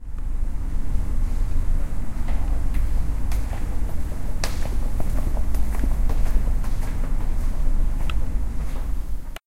Person walking in 'Tallers' area in Campus Poblenou with noise of climatization.
Walk, noise, Tallers, climatization, Footsteps, Foot, campus-upf, person, UPF-CS14, ground